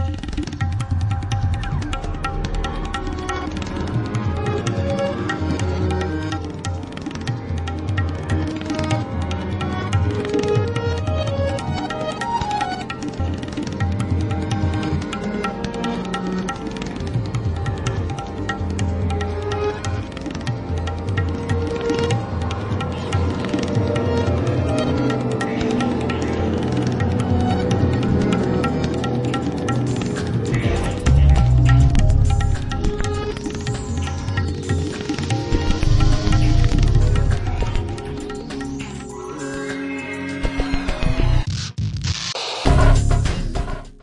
Indian nightmare
It's like having a nightmare in India.
Made with Grain Science and Vogue MK2 apps and Apple loops, edited in Garageband
nightmare, percussion, india, haunted, scary